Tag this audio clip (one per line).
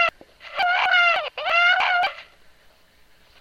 very-embarrassing-recordings
screaming
not-art
noise
psycho
yelling
stupid
vocal